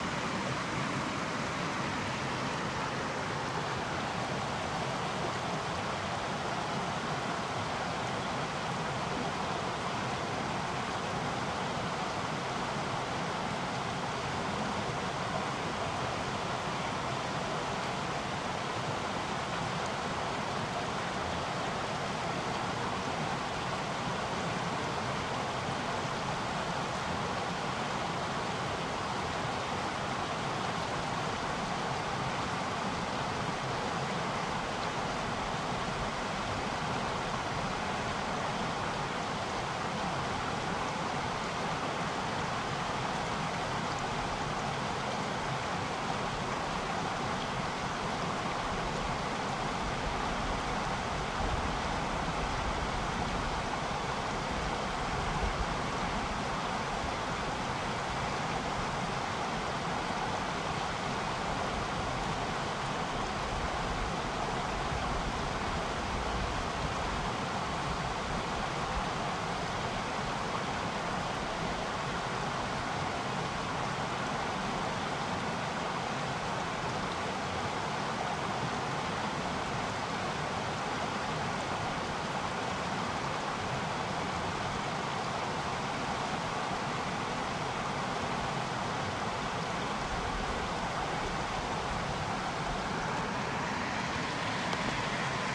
Recording of a fast flowing river.